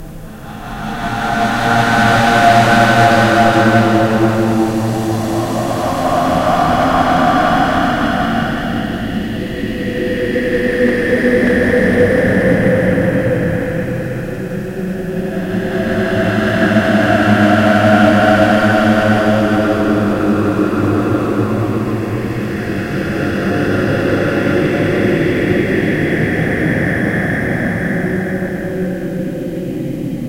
Ghost Voices
Ghost, Strange